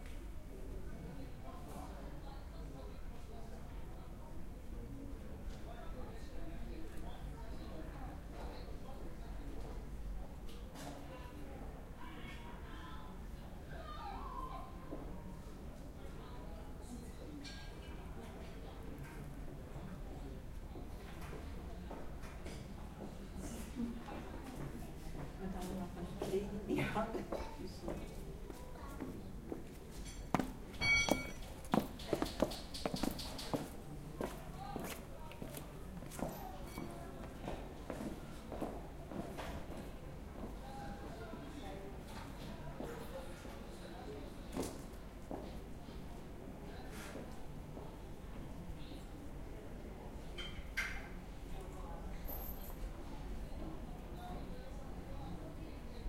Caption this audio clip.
STE-009-lisbon alfama01
The ambience of the Alfama district in Lisbon.
soundscape, portuguese, city